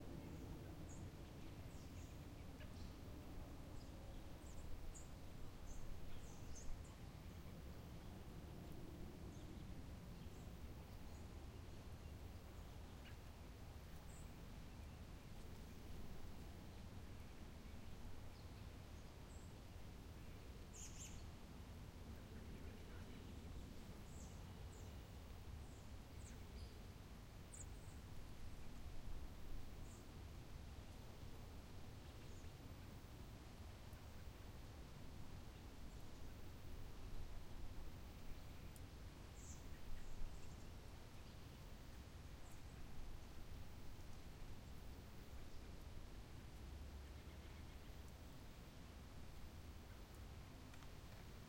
LR REAR QC MARSH TOWN BG SUMMER
canada, marsh, suburb, summer